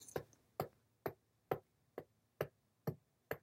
A sound of a hammer being used to work on a plane.
hammer, hit, work